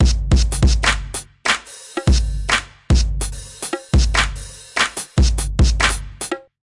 experimented on dubstep/grime drum loops